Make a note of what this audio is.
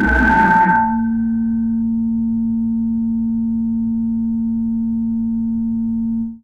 DJB 75 larsen
Some Djembe samples distorted